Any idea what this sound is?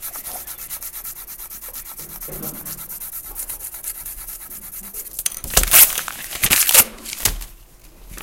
rubbing and tearing paper
rubbing paper together then ripping the paper.
paper, tear, white